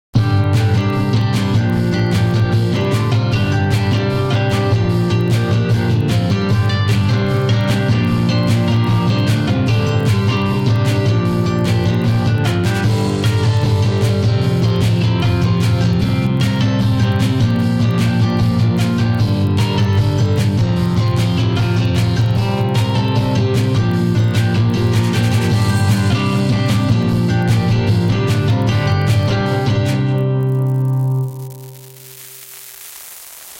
Hard Rock Route - Club Old Radio
Music Sheet AI generated: Payne, Christine. "MuseNet." OpenAI, 25 Apr.
and
I rewrote it.
SFX conversion Edited: Adobe + FXs + Mastered
Music
Bass, Beat, Blues, Broadcast, Cinematic, Club, Drum, Drums, Electric-Guitar, Film, Guitar, Hard-Rock, Kick, Movie, Music, Noise, Piano, Radio, Rock, Sample, Station